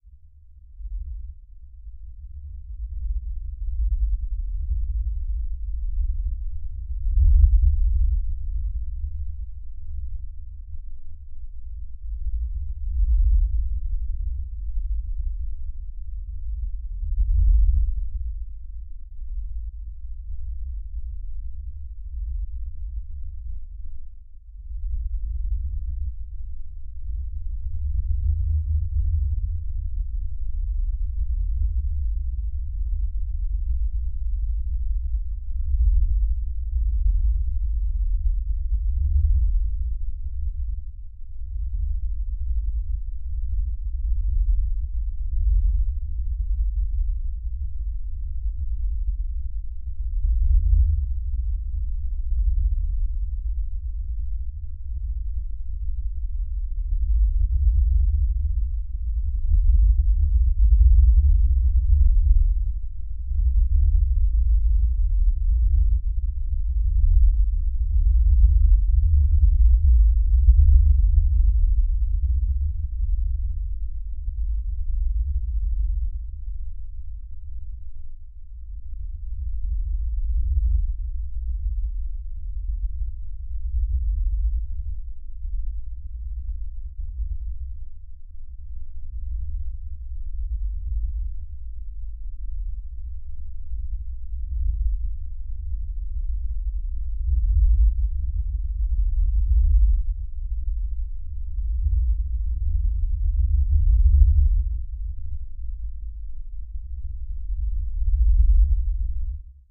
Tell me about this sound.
I filtered the flowing of the river and made it sound like a distant rumble like the storm is coming.